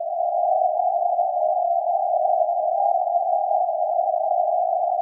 Some multisamples created with coagula, if known, frequency indicated by file name.
choir, space, multisample, synth, chorus